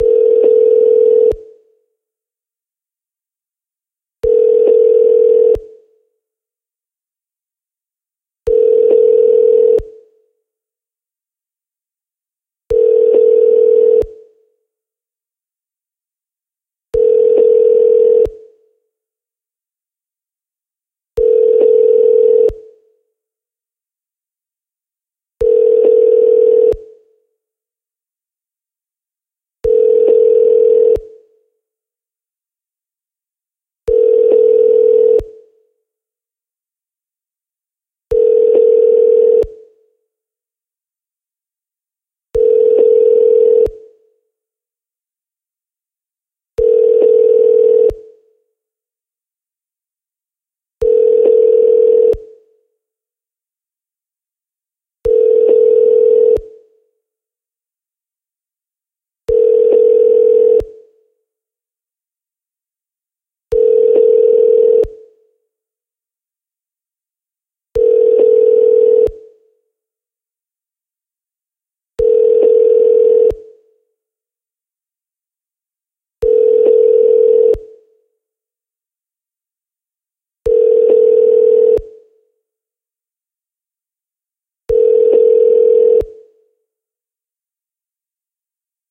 US Dialing Tone
The sound when you make a call in the US.
us, effect